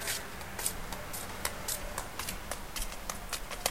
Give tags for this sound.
field-recording steps street

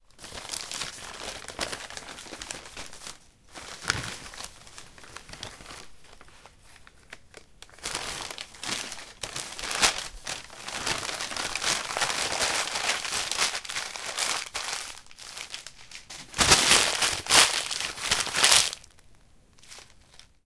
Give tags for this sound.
crinkling dare-9 field-recording packaging paper present trash wrapping-paper

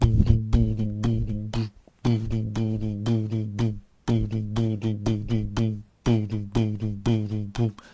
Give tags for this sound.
bass beatbox bfj2 creative dare-19

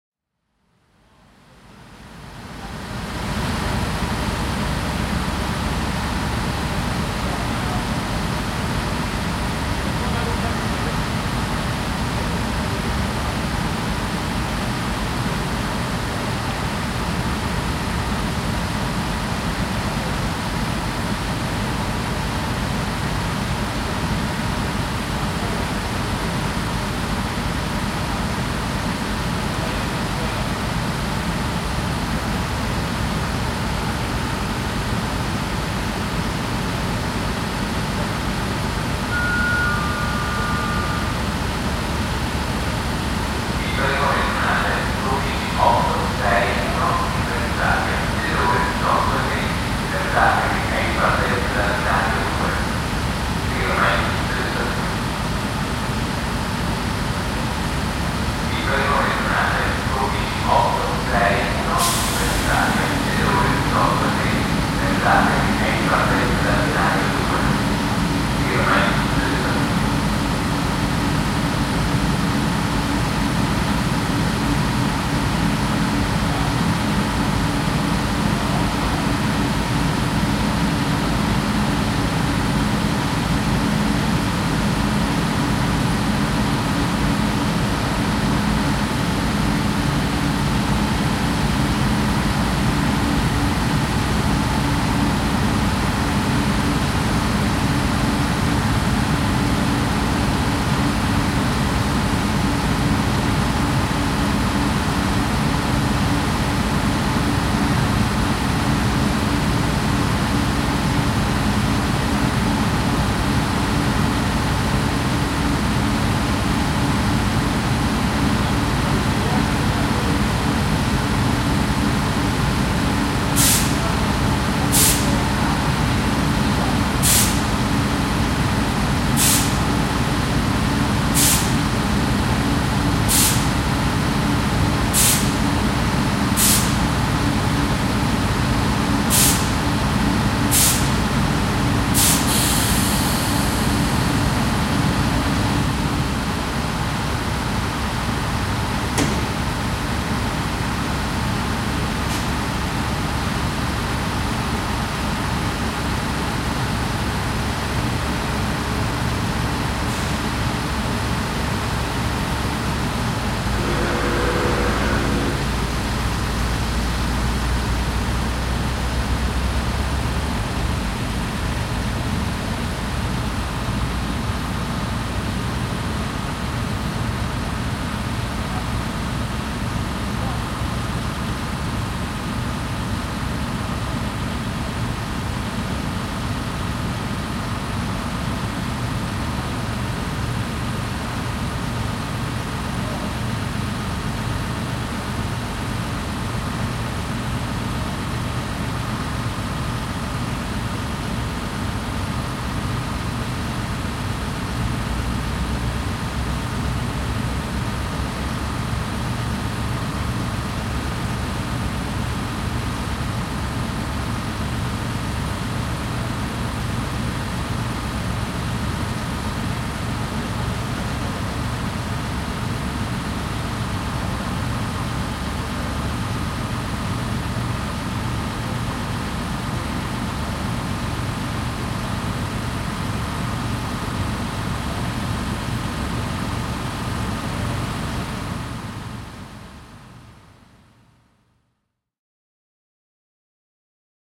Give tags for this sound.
Train noise Palermo Stazione-Notarbartolo

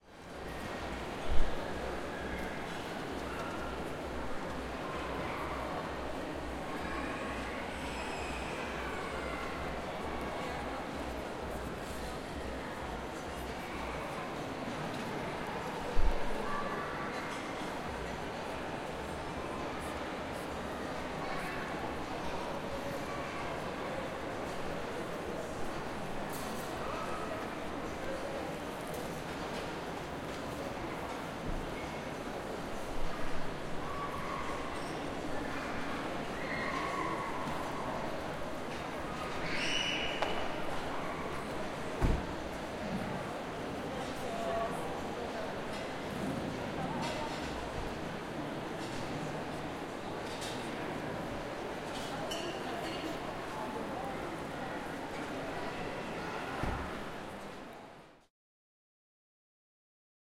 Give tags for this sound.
ambient crowded CZ Czech

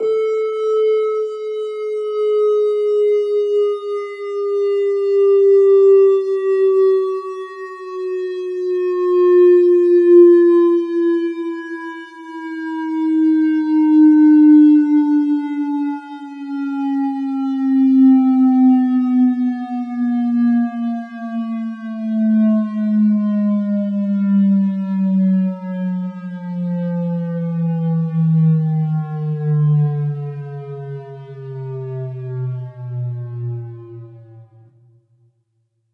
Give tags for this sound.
doom power slide down synth

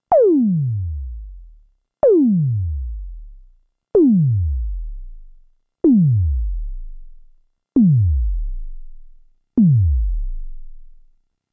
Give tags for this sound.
analog korg mono poly toms

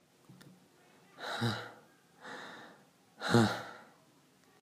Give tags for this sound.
Low Person Wind

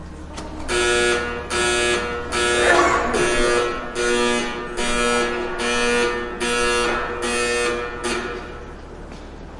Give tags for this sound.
small airport luggage conveyor

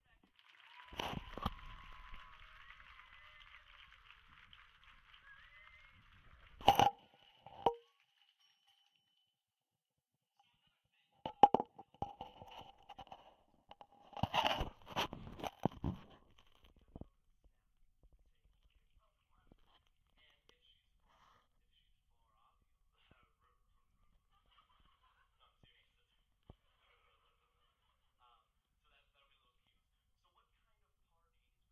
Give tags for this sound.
applause Cheering

